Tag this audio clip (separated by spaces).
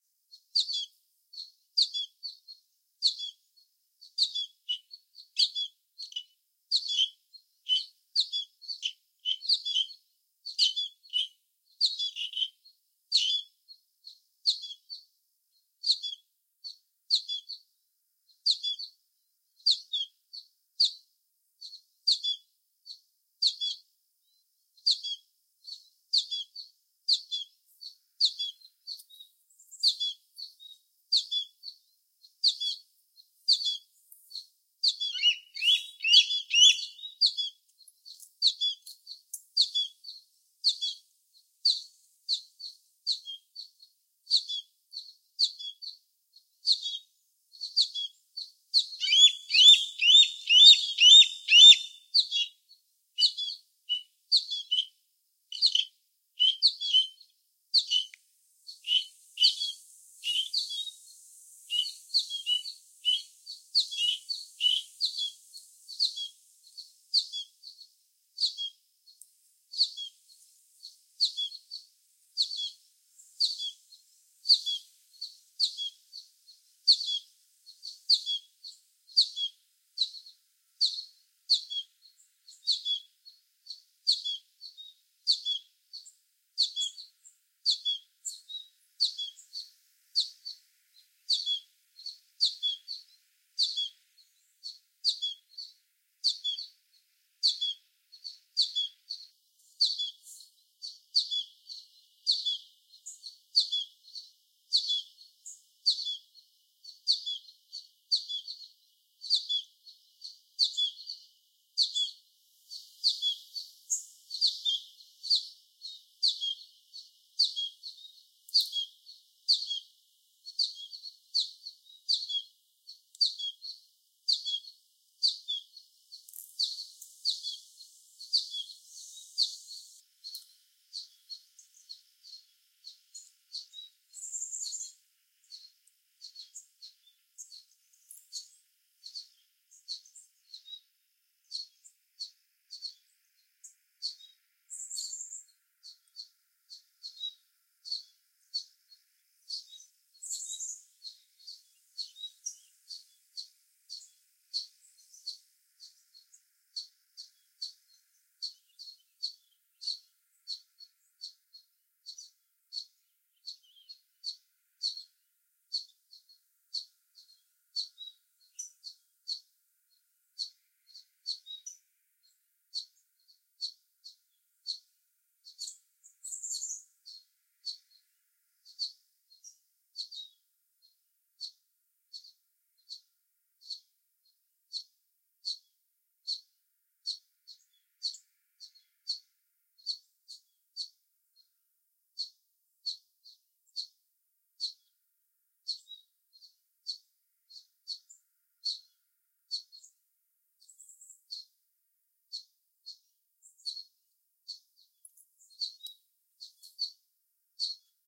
birdsong field-recording